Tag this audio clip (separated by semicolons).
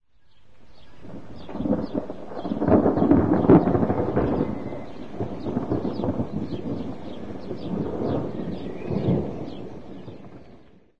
lightning; storm; thunder; thunderstorm; weather